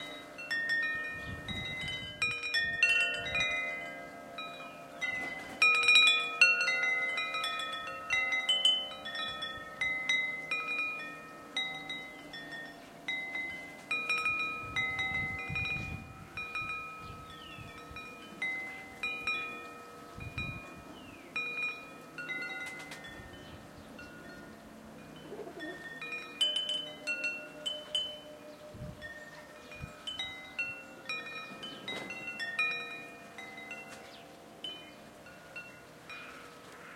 Garden chimes
Early March in South Yorkshire, close to the Moors of the Pennines. You may hear some of the wind noise as well as some of the garden birds in the background.
breeze, chimes, field-recording, rural-garden, sparrows